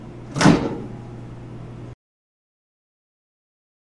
Opening microwave door
door, microwave, open